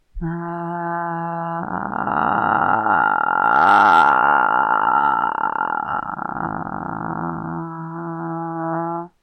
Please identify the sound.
vocal fry example female
Transition of female normal voice to vocal fry and back. Performed and recorded by myself.
female, fry, one-note, transition, vocal-fry